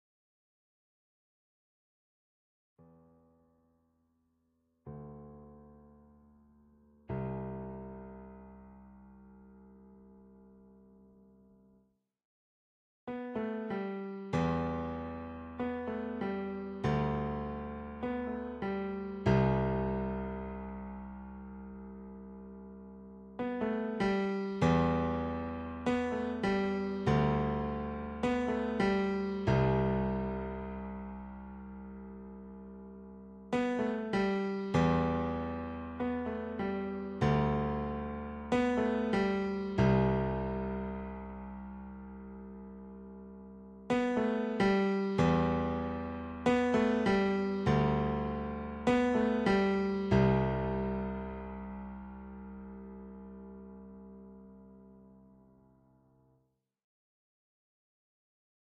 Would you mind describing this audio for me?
short slow piano piece. i made this in Garageband.